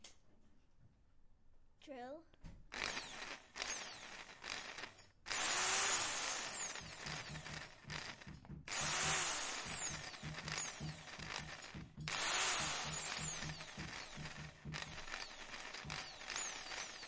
sonicsnaps GemsEtoy daviddrill
sonicsnaps, Etoy, TCR